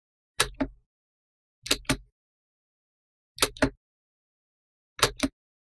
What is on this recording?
Pressing spacebar on computer mechanical keyboard
Pressing the spacebar on a computer mechanical keyboard